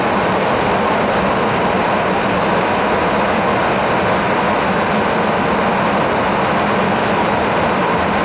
Jet Plane Wind Noise Loop of a KC-135 Stratotanker 2
Wind sound around a camera filming the refueling of jet fighters from a KC-135.
aviation, engine, fighter, military, noise, wind